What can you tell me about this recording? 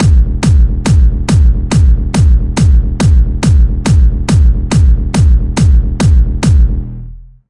Industrial Drum Beat 2 (140bpm)
beat, dark, drum, ebm, electro, industrial